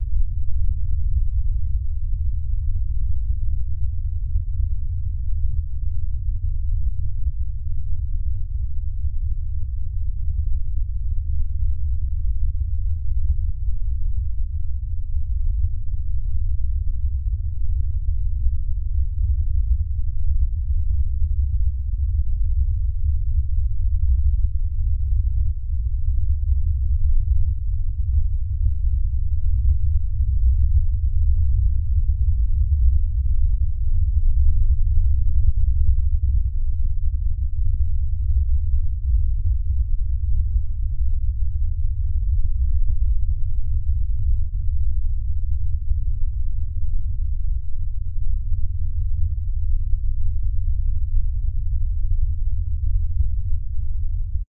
Deep Back Ground subwoofer
a very lower bass tone with amplitude, an effect that can be included in a thriller scene or other uses.
Deep-Back-Ground, subwoofer-bed, tiller-suspense-scene